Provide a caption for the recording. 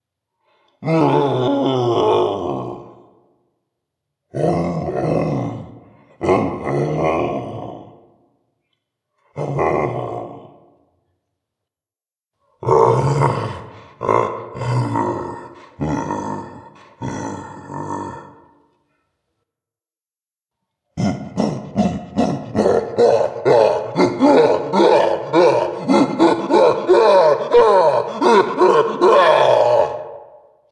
A cave gorilla. And he is angry.
Thank you.